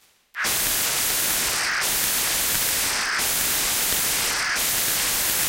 It sounds like a demon screaming, the devil itself.
Gave me goosebumps when i made it